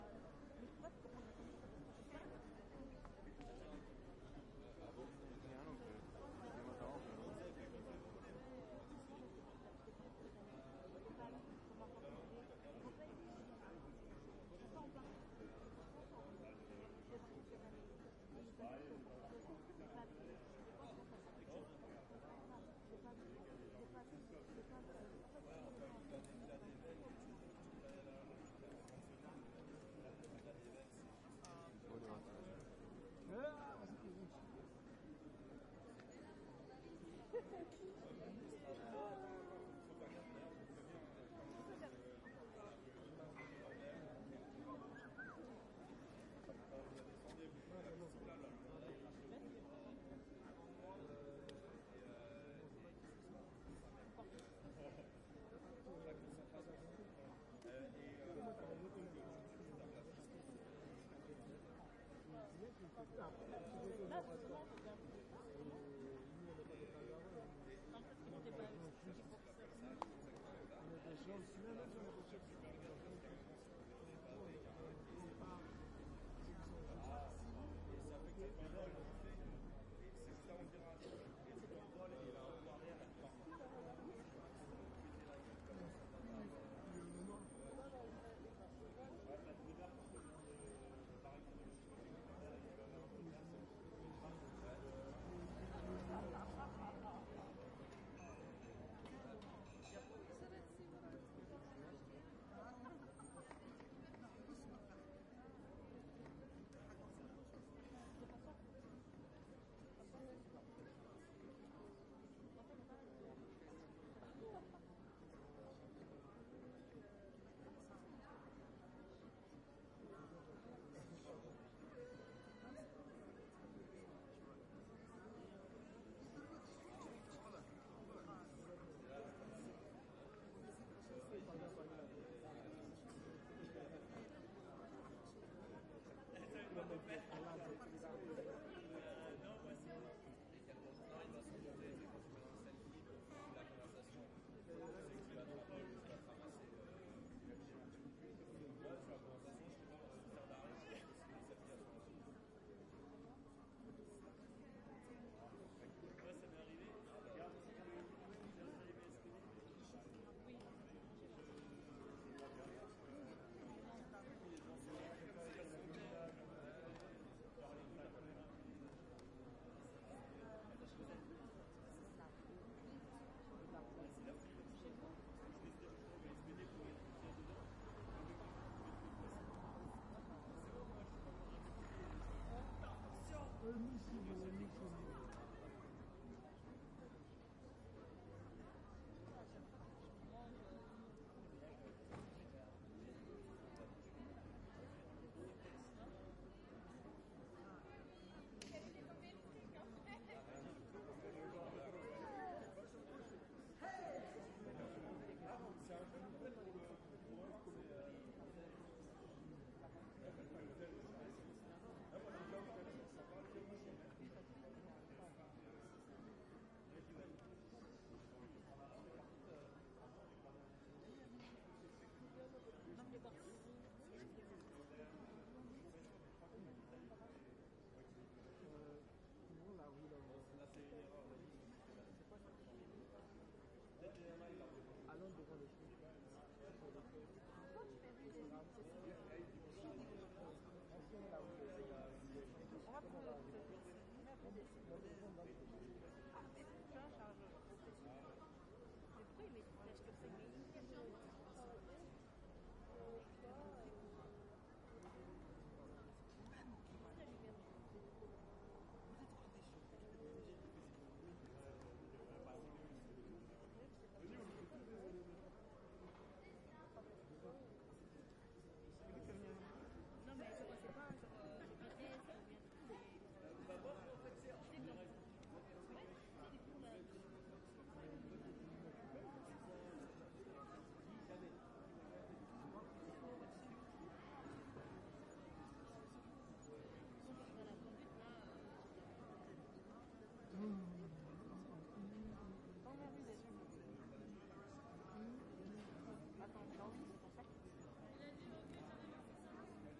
HOW A FRENCH CITY SOUNDS V3
We went to Strasbourg to follow an invitation by an photographer to his vernissage! To bad that we got stucked into the traffic jam and arrived while the gallery was already closed! That was really a bummer because the drive took us FOUR hours!
Lucky that I had my Zoom H6 because Strasbourg is by far an amazing city with so many street life. It is insane how many little streets with these tiny cafes and all the people around! It is like a magnet and you got sucked in.
The french people know how to live life and I recorded in 3 parts of the city to give you a nice ambience feeling.
This is part 3
ambiance, ambience, ambient, atmo, atmos, atmosphere, atmospheric, background, background-sound, city, field-recording, france, french, general-noise, life, noise, people, soundscape, street, white-noise